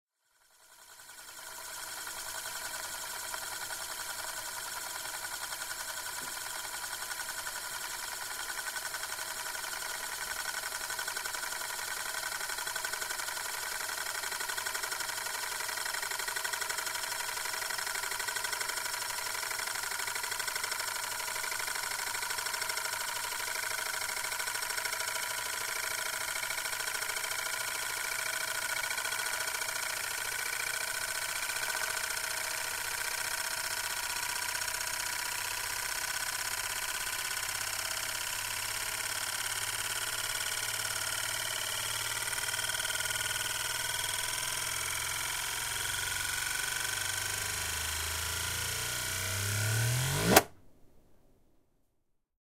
Euler's Disk - final 50 seconds
This is the final 50 seconds of a spinning Euler's disk. Notice the abrupt change in the last second.
science, SFX, sound-effects, math, mathematics, physics, Eulers-disk, SoundFX